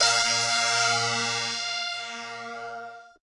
Live Dry Oddigy Guitar 12 OS
guitars, live, bitcrush, bass, free, distorted, grit